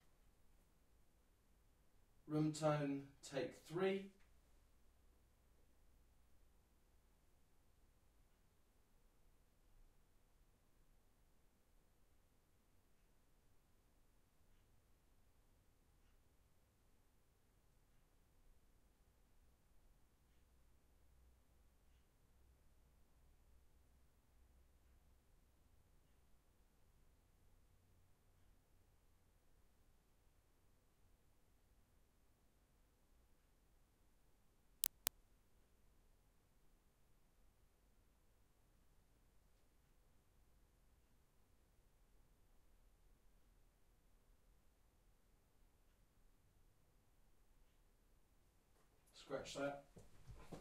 CFX-20130329-UK-DorsetRoomTone03
Room Tone House